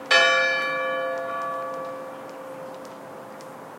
Church Clock Strikes 1
The church bell strikes 1 oclock
bells, cathedral, church-bell, clock